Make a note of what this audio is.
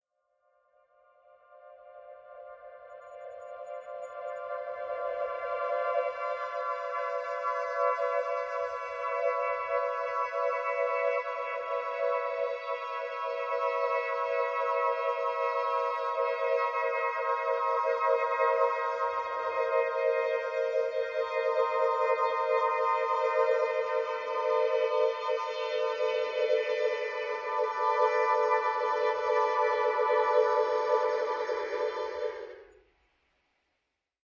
Digital Highway
A modulated version of a bi-tonal vocaloid sound
digital, electronic, harsh, noise